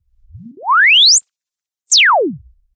Self-Oscillating Filter sweep
analogic, filter, JD-Xi, Lowpass, resonance, roland, Self-oscillation, sweep, synth